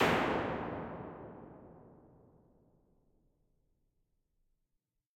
Large Dark Plate 03
Impulse response of a large German made analog plate reverb. This is an unusually dark sounding model of this classic 1950's plate. There are 5 of this color in the pack, with incremental damper settings.
IR, Reverb, Response, Impulse, Plate